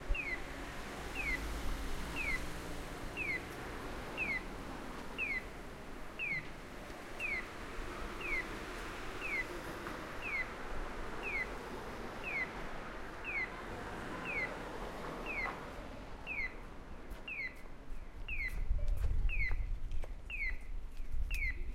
Pedestrian Crossing Japan
Pedestrian Crossing, recorded near Fushimi Inari, Kyoto, Japan.
Recorded with a Zoom H1.
Crossing, Japan, Pedestrian